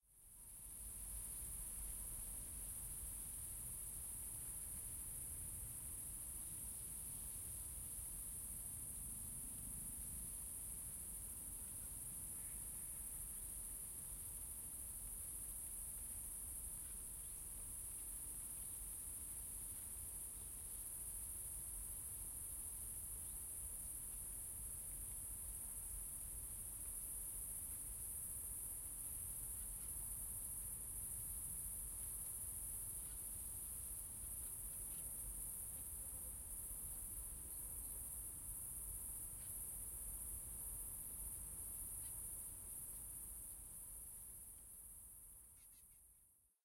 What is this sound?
suburban park crickets birds summer
birds, crickets, nature, park, suburban, summer